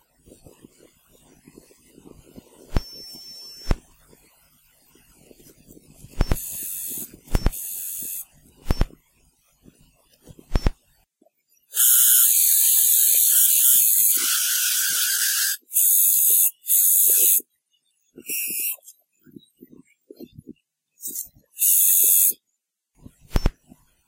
read pendrive
Sounds of the internal PC when reading various files from a pen drive.
Recorded with a regular recorder, using the line output as source.